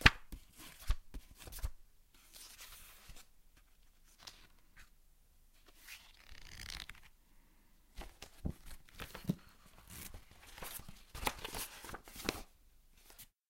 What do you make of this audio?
Book - Page find
Audio of of ruffling pages as someone searches for a certain page, paragraph or quote.
book, book-pages, page-find, pages